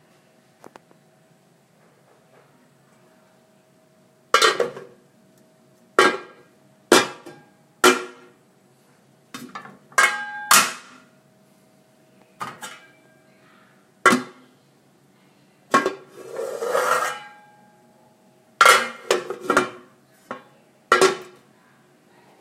Panela- Pan
Som de panela
pots, cooking, kitchen, chef, pan, frying, pot, metal, stove, pans